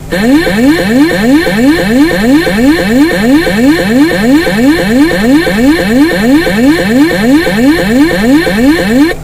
A nice alert tone
space, explosion, laser, ship, aliens, tone, beat, noise, computer, weird, alert